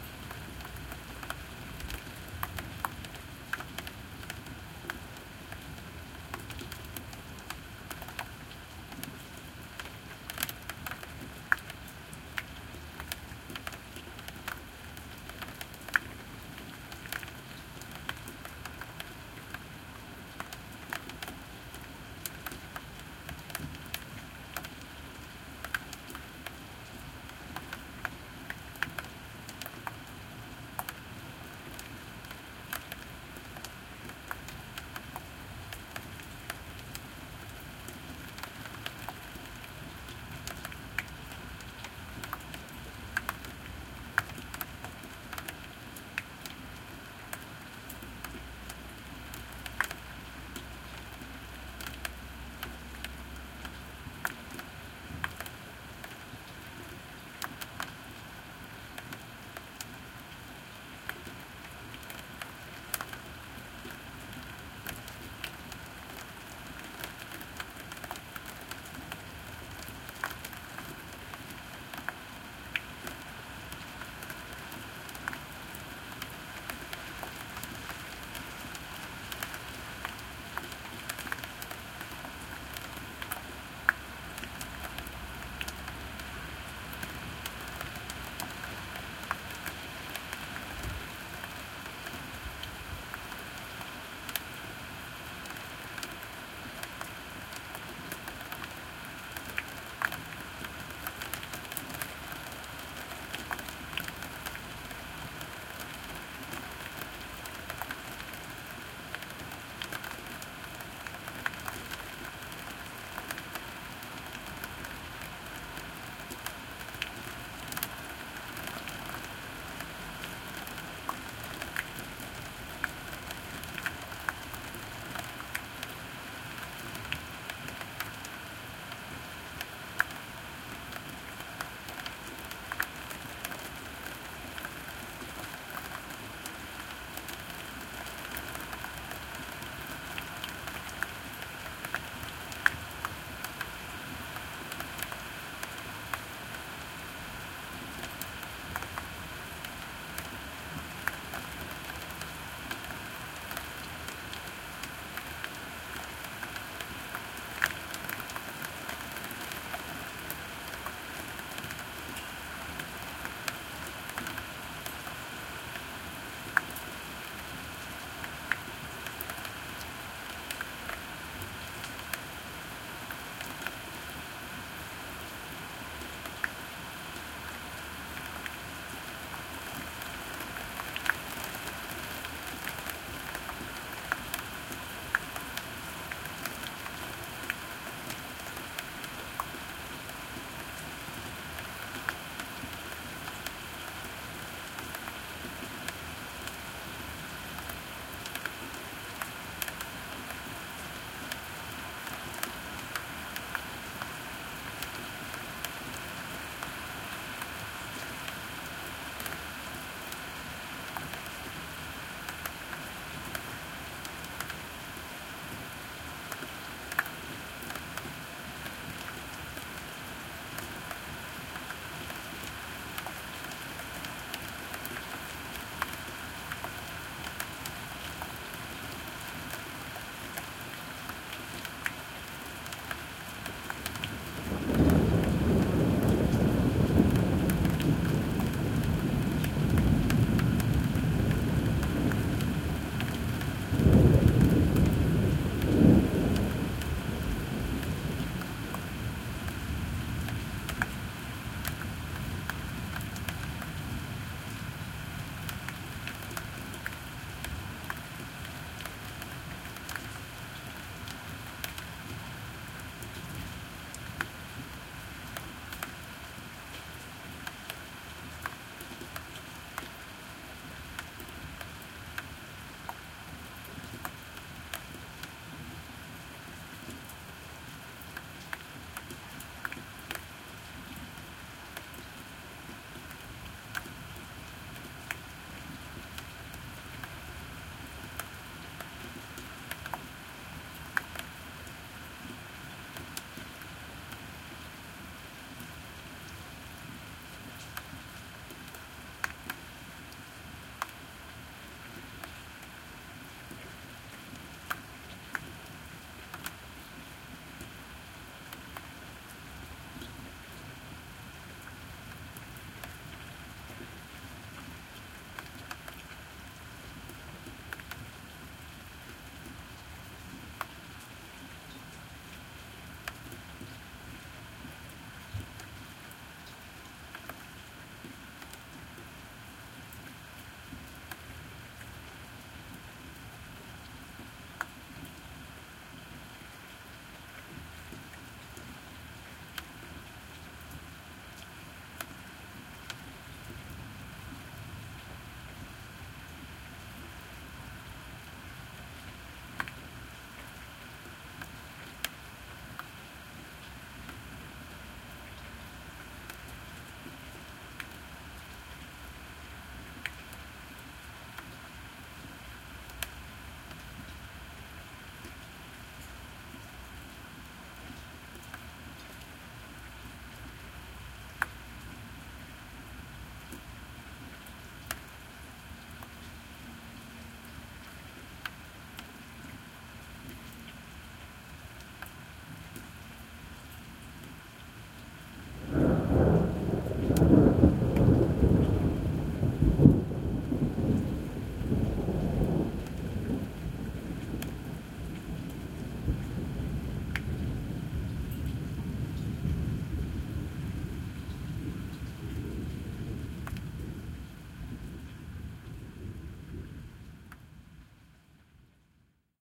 Midnight Rain, Mic on the Windowsill
Stereo recording via SONY Xperia XZ1 Compact